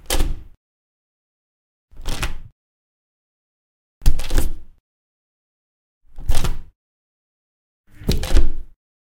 Recorded a window lever to use as a train emergency brake in my audio play.